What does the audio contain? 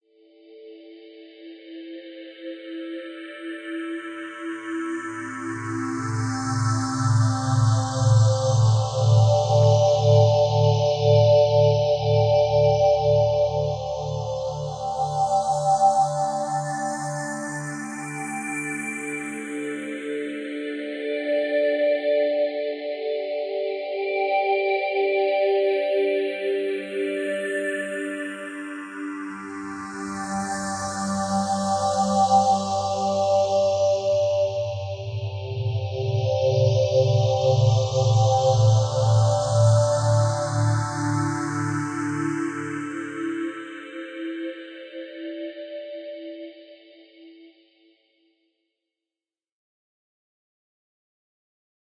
Another soundscape created in MetaSynth.